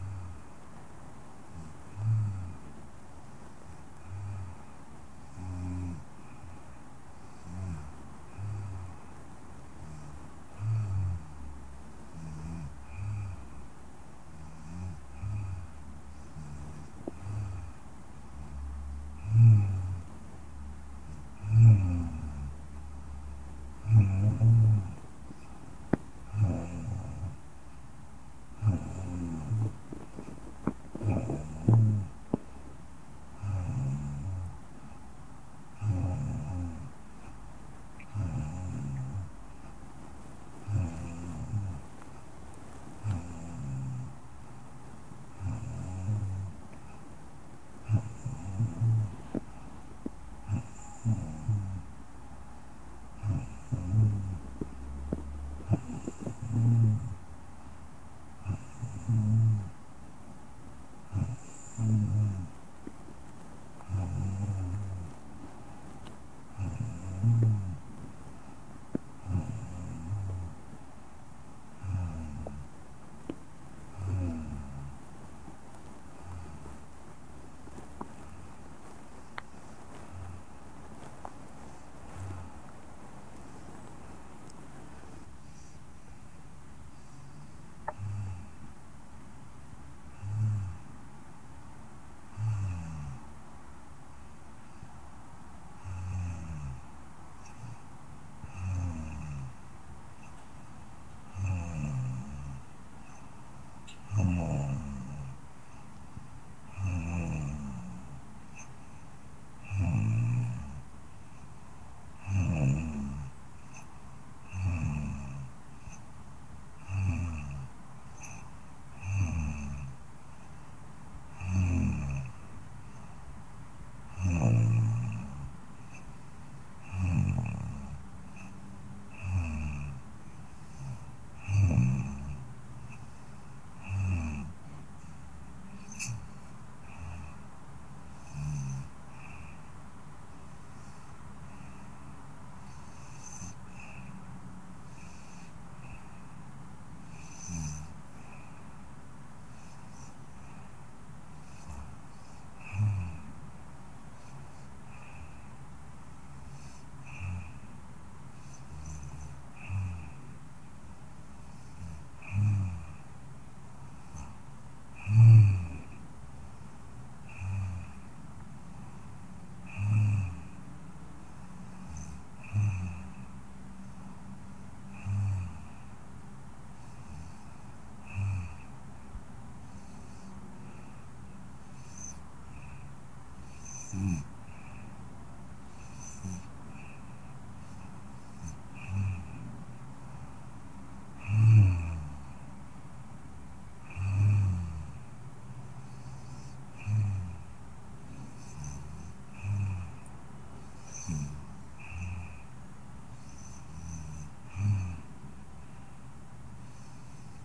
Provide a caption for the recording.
Soft Female Snoring
A gentle female snore
breath, breathing, female, human, muffled, nasal, nose, sleep, snore, snoring, tired, zzzzz